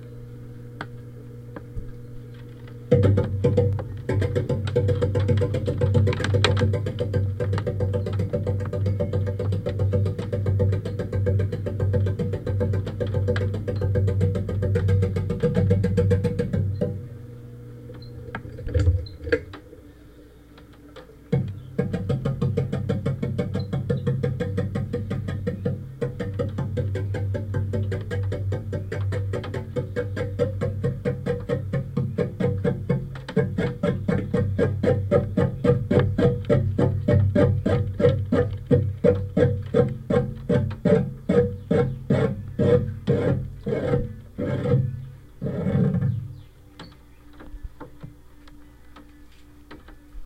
fan stop
Contact mic on the rafter of a covered patio with a ceiling fan. I'm stopping the fan by banging my finger against the blades
bang, banging, rasp, rasping, rhythm, rhythmic, scraping, thump, thumping, whirring, wood, wooden